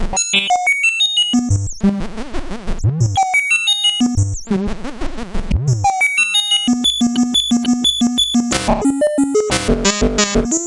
Short Serge modular synth snippet (loop) with 16-step sequencer modulating all sorts of parameters. This was recorded with one of the OG Serges still lurking at CalArts in Valencia, CA, USA. Recorded direct to Digital Performer 4(?)